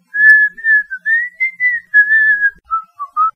random whistle I WAS gonna use, but I used a different one.